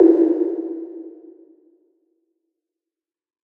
A mellow synthetic tom with trail